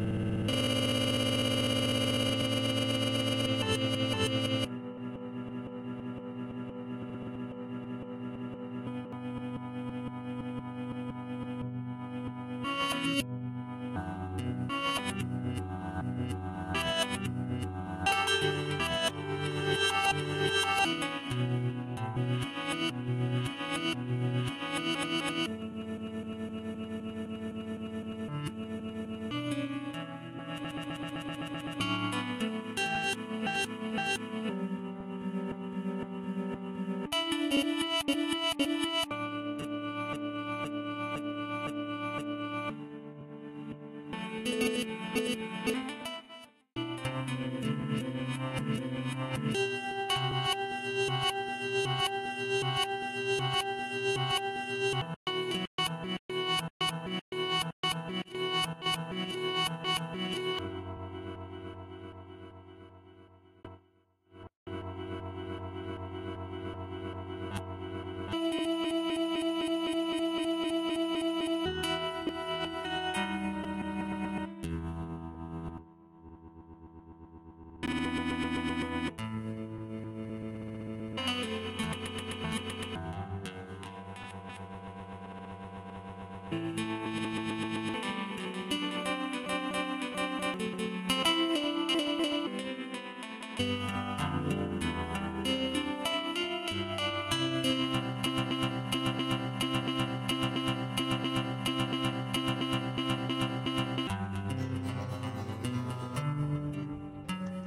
Beautiful Bach Song on Guitar Played Backwards and Various Glitching, made entirely in Ableton 9. I've been recreating some classics using various instruments and warping them as well.